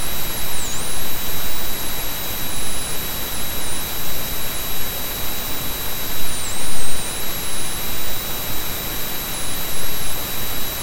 PCM Unplugged Microphone Jack Noise Recording
Recording of noise produced by unplugged microphone jack on my computer. Weird whistle sound moving from 6.5 kHz to 15 kHz randomly with pink noise on background. Removed DC part of the sound and normalised with Audacity. Sound was cut to make it loopable.
Please make sure to mention me in credits.